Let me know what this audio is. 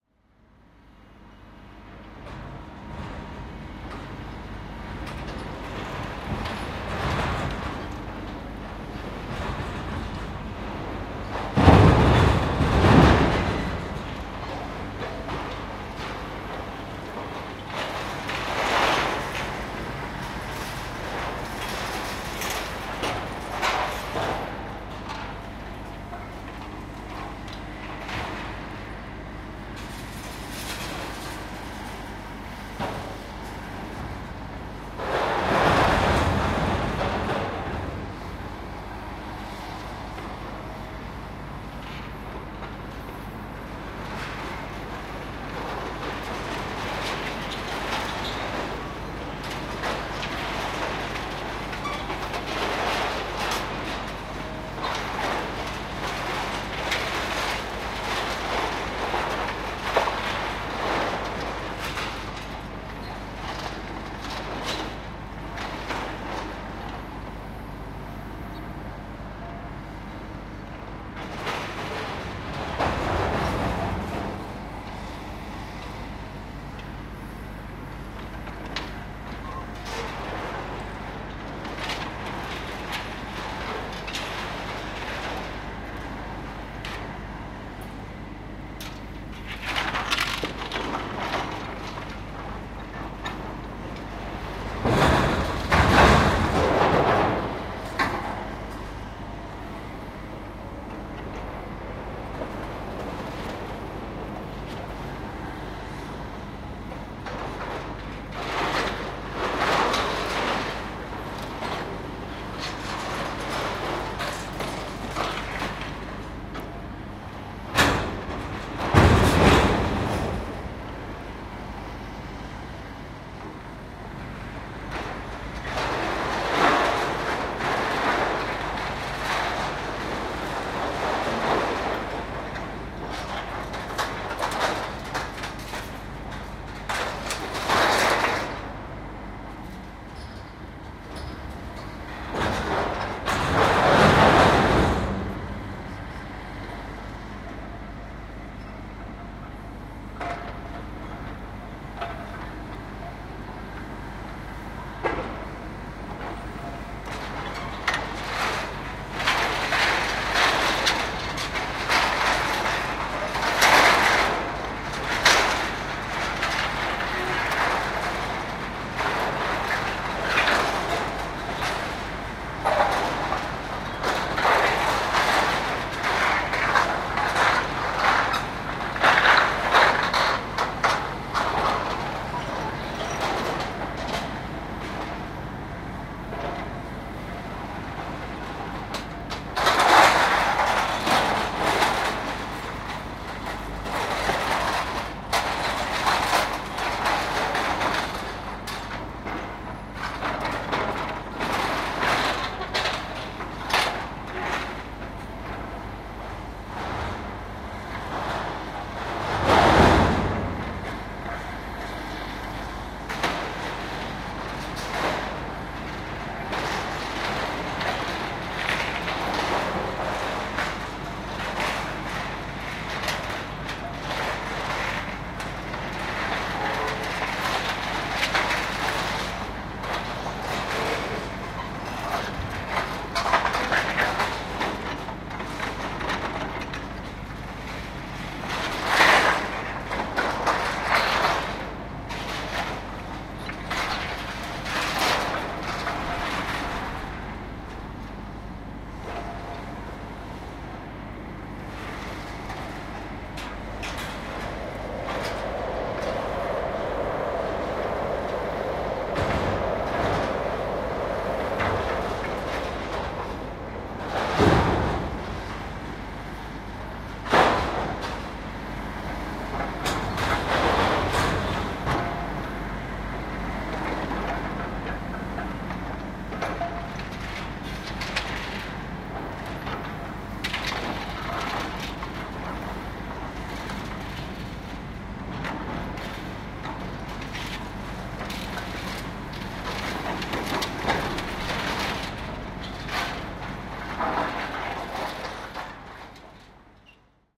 Field recording of a demolition plant in a Paris suburb. Demolition waste is beeing sorted and filled in a container. Record with a zoom h2n in X/Y stereo mode. There's a train passing by at the end; since it seemed to fit naturally in the soundscape, I chose not to remove it...